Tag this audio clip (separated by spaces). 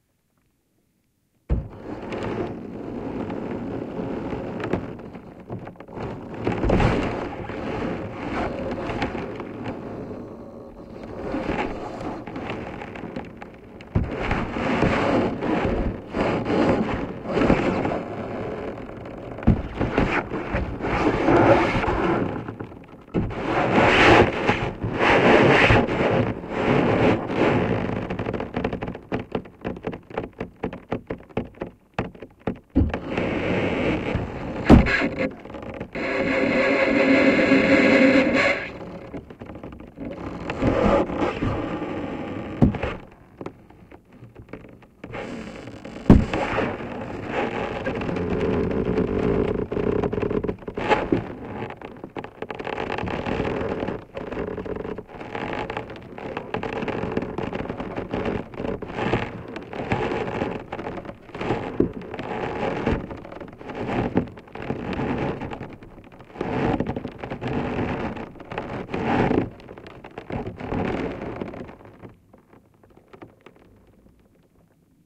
water trosol spaced-pair ice lever crack creak thump squeal field-recording hydrophones groan